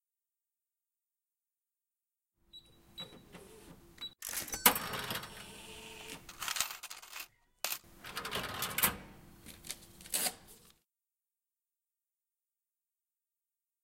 A cash register being used.
cash-register, money, coins, cash